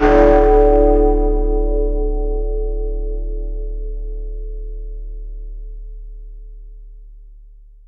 Sound of very large bell being struck.